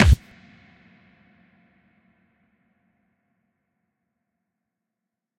PUNCH-BOXING-05-REVERB
06.22.16: A punch created from the sound of a leather glove being whipped, processed alongside a thickly-layered kick drum.